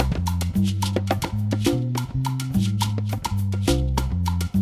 Vintage Latin Drums - 2 Bars 124bmp
2 Bar vintage latin drum top loop, with great rhythm and vibes.